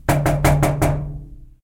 Knocking iron door3
knock, knocks, knocking, door